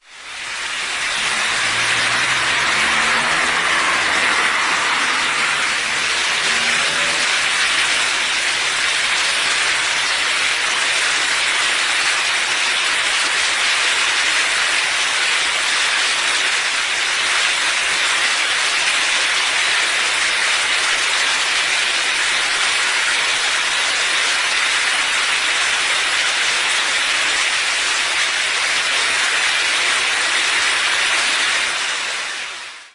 08.09.09: about 21.00; Tuesday in Sobieszów (one of the Jelenia Góra district, Lower Silesia/Poland); Sądowa street; the Wrzosówka river
river, sobieszow, swoosh